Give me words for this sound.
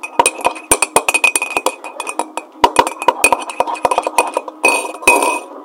tab as can shakes